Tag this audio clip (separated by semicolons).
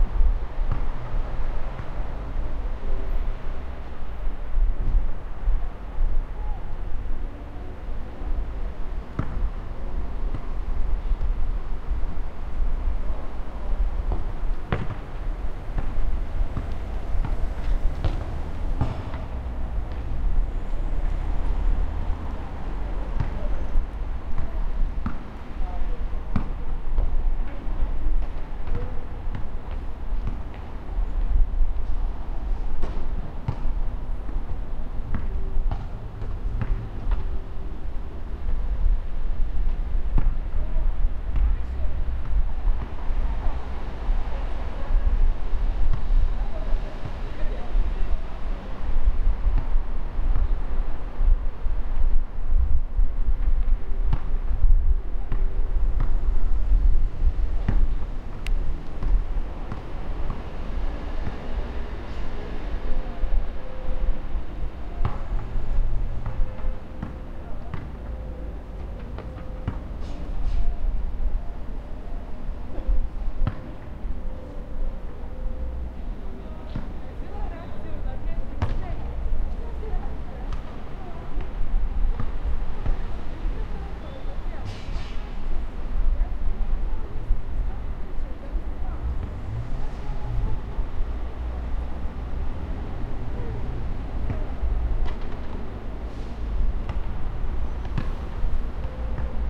Basketball; Street; Public; Cars; City; School; Field-Recording; Kids; Playing; Evening; Traffic; Ball; Playground; Road